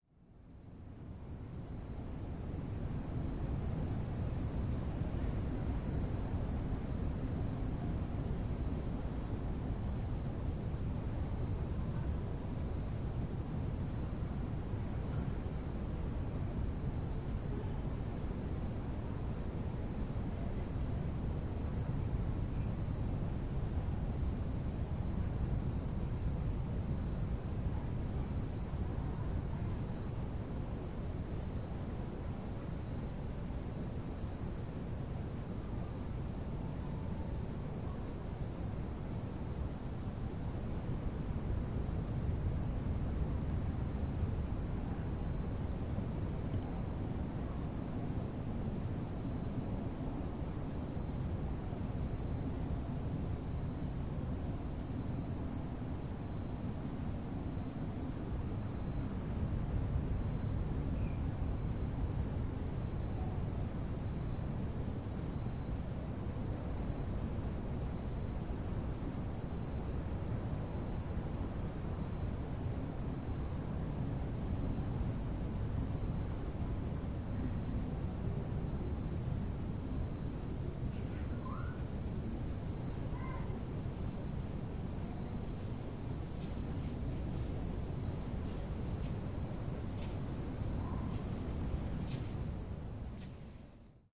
FR.PB.NightAmbience.1
NightAmbience at PraiaBranca, Brazil. Sea-waves as background, several kinds of insects making their performance
ambience, ecm907, insects, nature, night, outdoors, sound